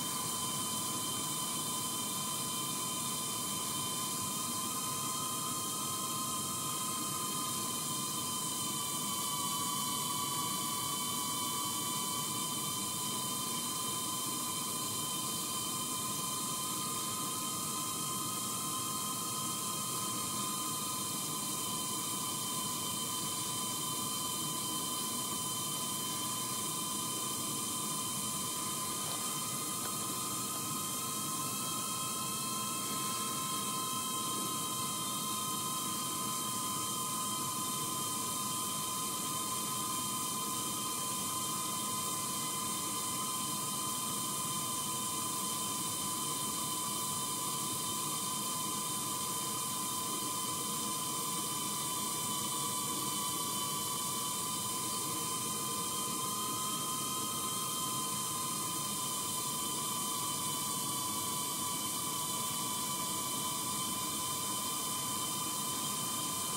Scary Waterpipe Sound at Apartment Complex
The sprinkler system was on the fritz, making this creepy, slowly-modulating sound like something out of a horror film. Recorded on an iPhone 3GS.
field-recording, strange, weird